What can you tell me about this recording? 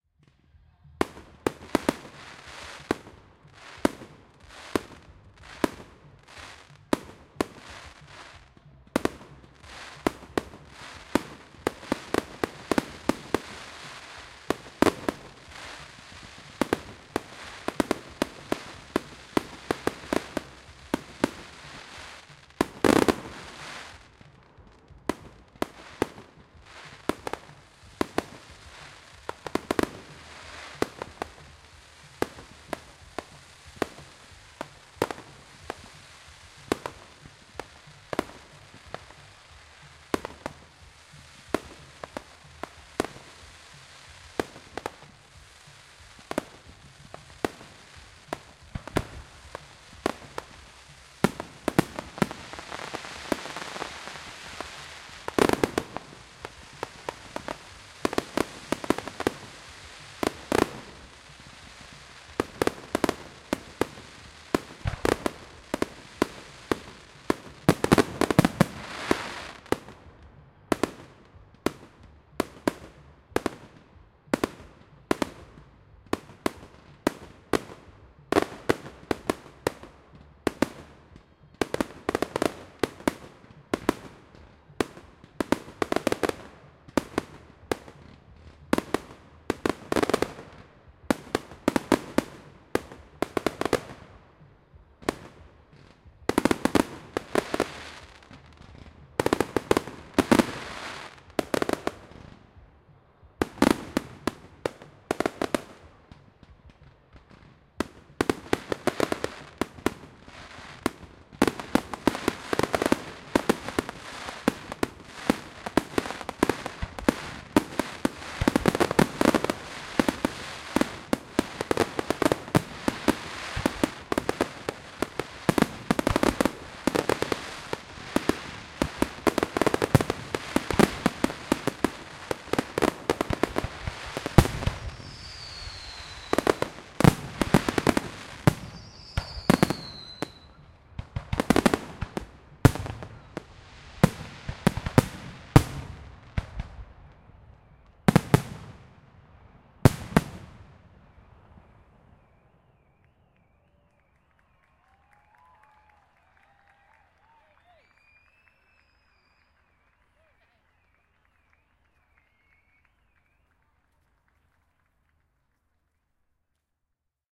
Fireworks, Close, E (H4n)
Raw audio of a fireworks display at Godalming, England. I recorded this event simultaneously with a Zoom H1 and Zoom H4n Pro to compare the quality. Annoyingly, the organizers also blasted music during the event, so the moments of quiet are tainted with distant, though obscured music. Crackling fireworks can be heard.
An example of how you might credit is by putting this in the description/credits:
The sound was recorded using a "H4n Pro Zoom recorder" on 3rd November 2017.
bonfire, display, explosion, fawkes, firework, fireworks, new, new-year, year